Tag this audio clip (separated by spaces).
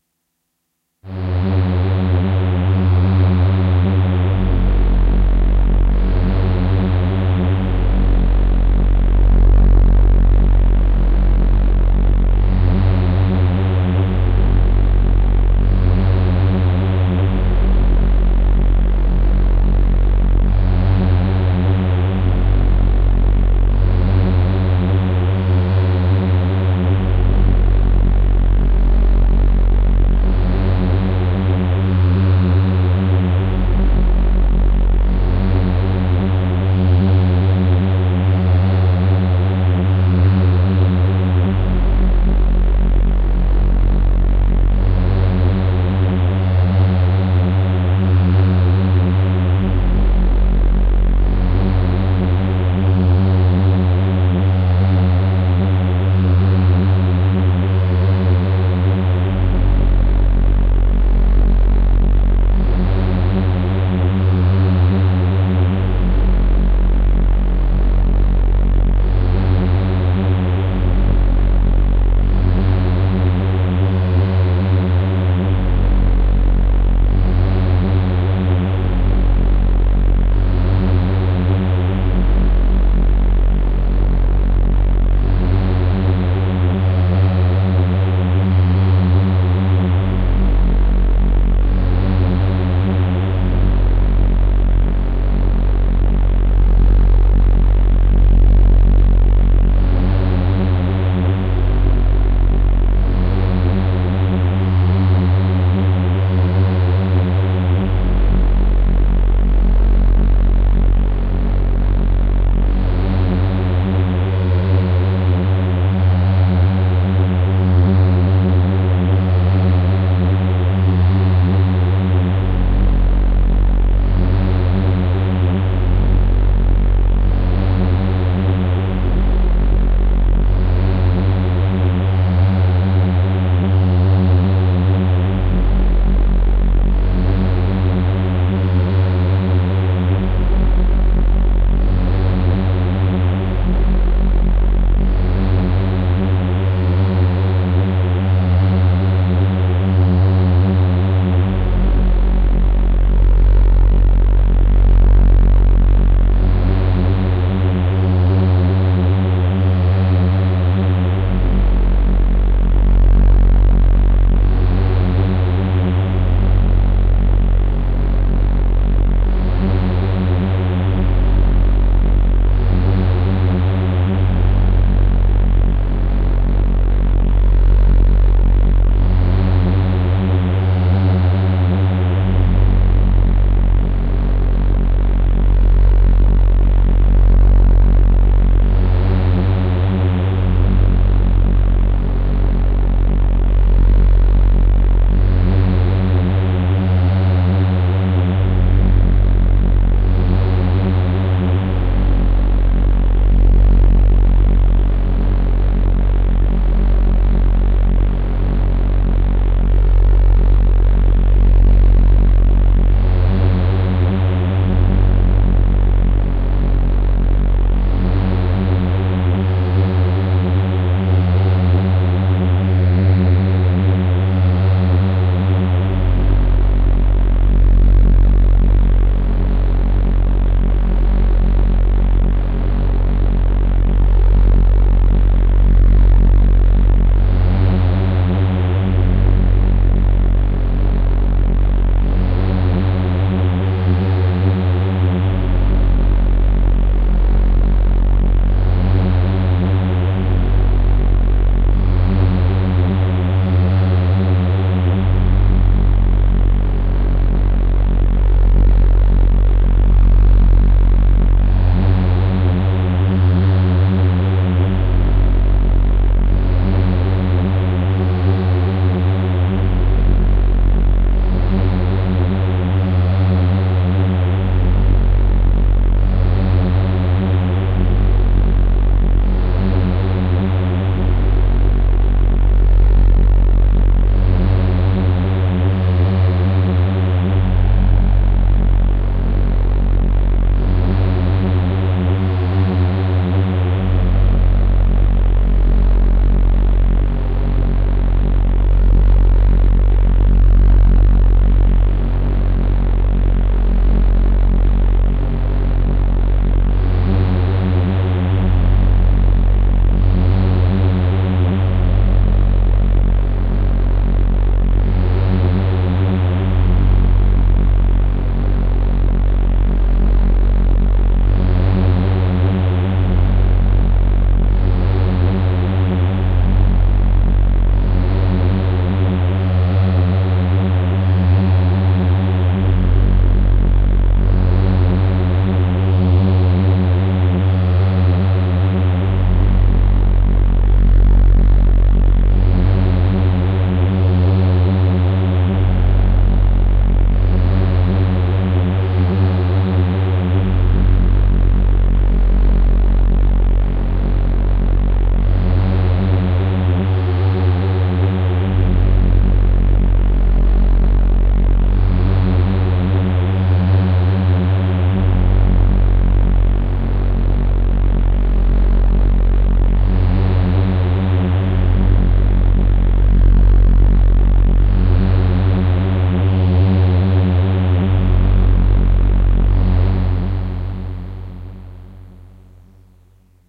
3 nordlead